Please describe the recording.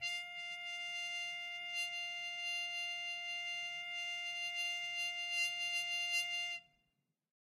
One-shot from Versilian Studios Chamber Orchestra 2: Community Edition sampling project.
Instrument family: Brass
Instrument: Trumpet
Articulation: harmon mute sustain
Note: E#5
Midi note: 77
Midi velocity (center): 31
Room type: Large Auditorium
Microphone: 2x Rode NT1-A spaced pair, mixed close mics
Performer: Sam Hebert
brass, esharp5, harmon-mute-sustain, midi-note-77, midi-velocity-31, multisample, single-note, trumpet, vsco-2